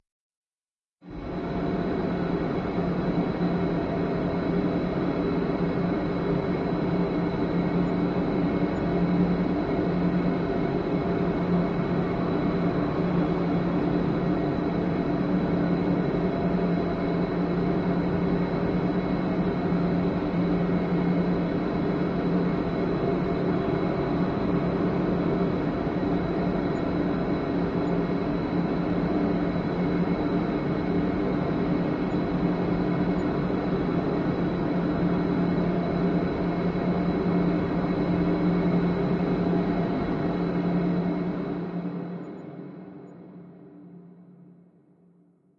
Horror Ambience 01
Creepy Horror Ambience I made
ambience, basement, horror, horror-ambience, scary